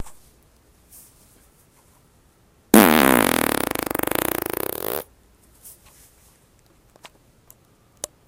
Raw recordings of flatulence, unedited except to convert usable format.